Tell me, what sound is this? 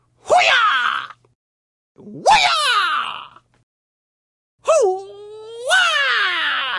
Kung Fu yell.
karate, kung-fu, martial-arts, ninja, wahya, yell